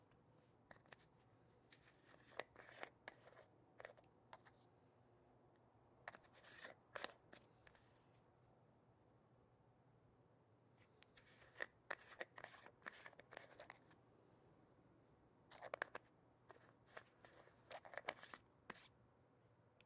Brushing hair with a brush